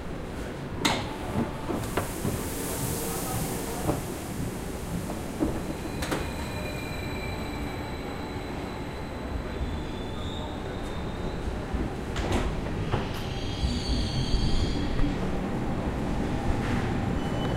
trainstation atmopheric+door 002
train door sounds
passenger-train, field-recording, rail, train-station, railroad, door-sound, railway, train